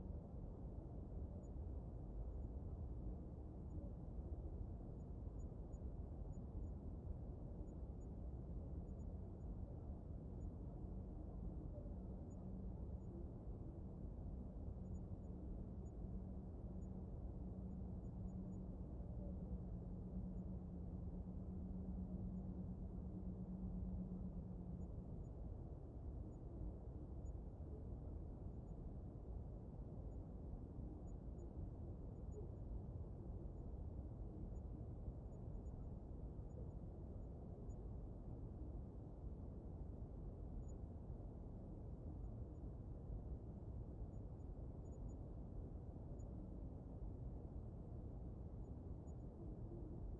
Misterious dissonant humming LP1kHz
Same as the previous file, but I have tried to remove some of the background noise using a LP filter at around 1kHz in Audacity. Sounds below 40Hz have also been removed.
I tried Audacity's Noise Reduction. It did reduce the noise level, but introduced artifacts. So I decided not to use.
dissonant, humming, mysterious, night, recording, strange, unknown